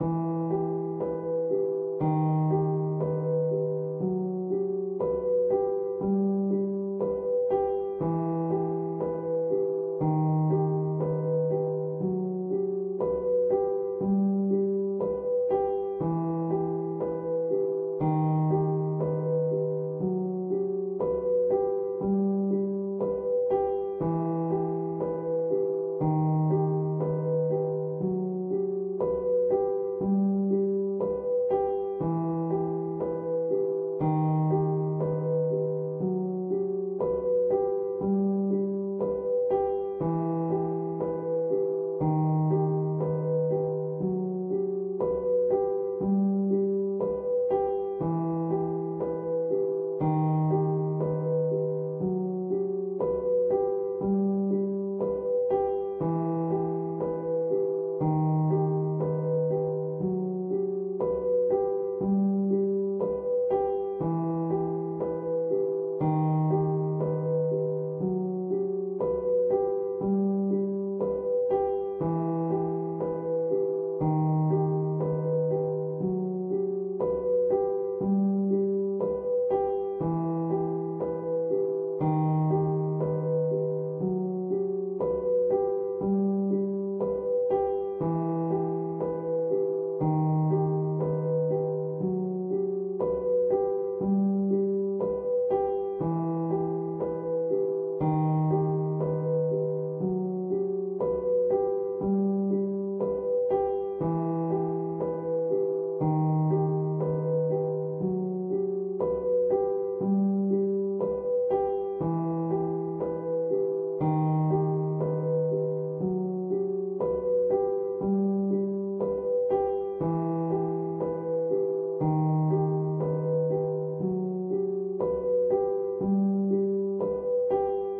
Dark loops 208 piano without melody long loop 60 bpm
60bpm, bpm, bass, loops, 60, piano, dark, loop